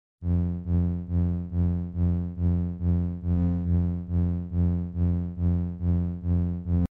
my bass audiosample, 120 to 140 bmp
electronica, trance, acid, dance, bass